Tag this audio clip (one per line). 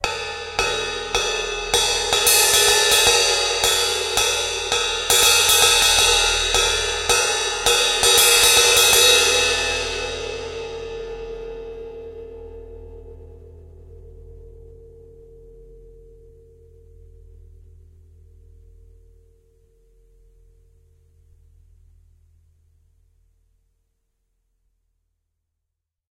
crash
cymbal
cymbals
drum
drums
gentle
h1
hit
hits
kit
live
metal
mic
microphone
noise
paiste
perc
percussion
play
recorder
ride
softly
stereo
zoom